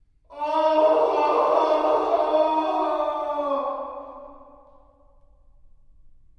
Scream owowo-1
Out of the series of some weird screams made in the basement of the Utrecht School of The Arts, Hilversum, Netherlands. Made with Rode NT4 Stereo Mic + Zoom H4.
Vocal performance by Meskazy
death, painfull, disturbing, fear, screaming, pain, scream, darkness, yell, yelling, funny, horror, weird, angry, anger